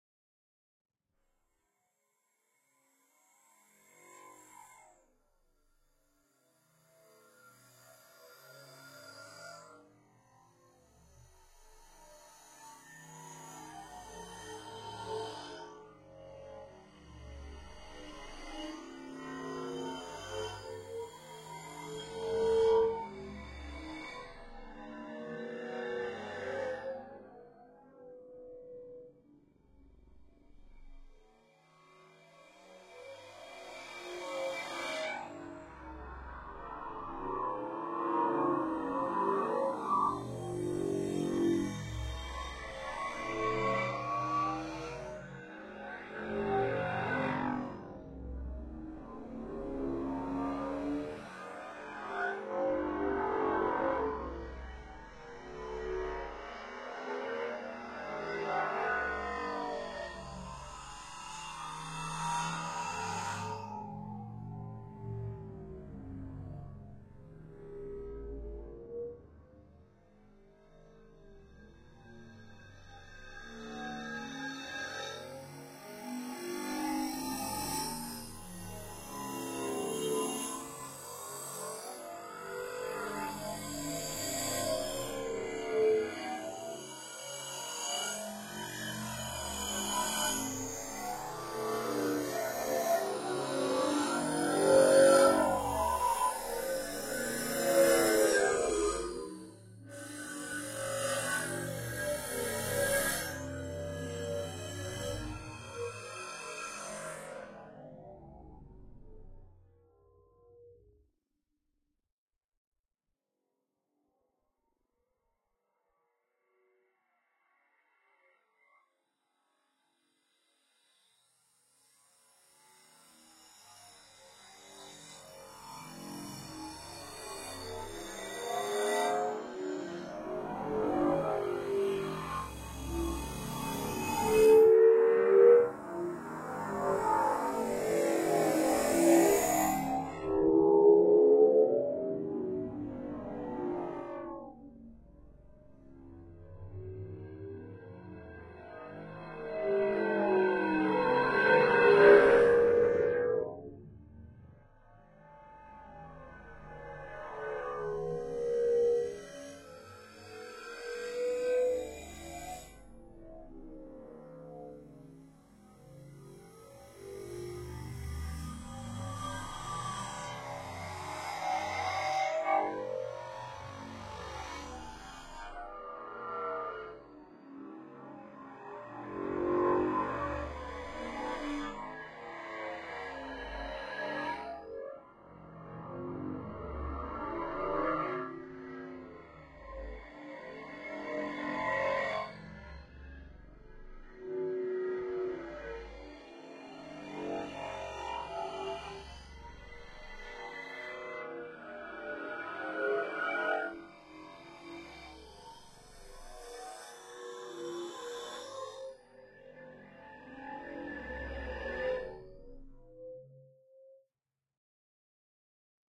strange sound design, futuristic bassline (maybe). Final step of processing of the bunker bar sample in Ableton.
Sadly dont remember what effect I put here ...